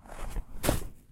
Jump, landing in snow02
Landing in the snow after a jump.
landing, snow, jump, frost, land, ice